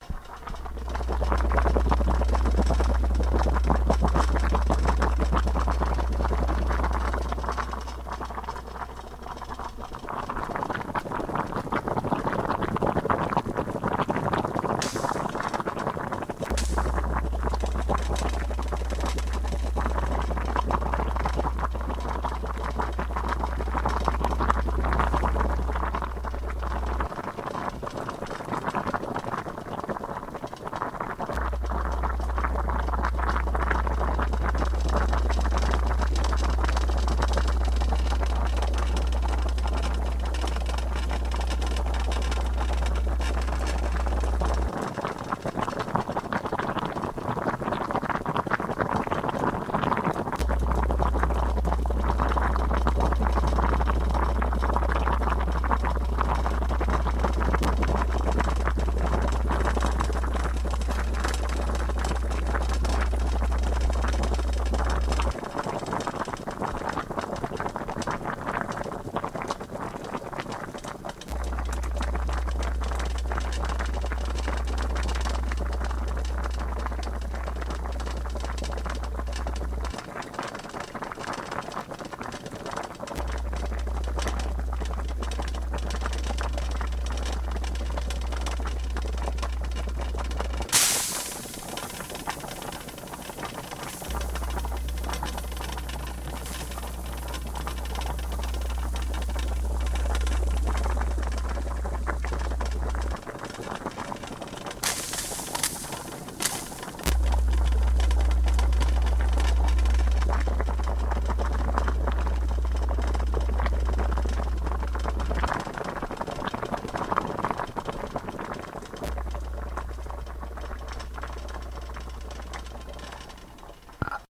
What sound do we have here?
This is the sound of a pot of water boiling on my stove. The stove is pretty old, and the rattling you hear comes from the noisy coils on it. Occasionally a drop of water gets out of the pot and lands on the coils, hence the hiss. Recorded with the trusty SM57.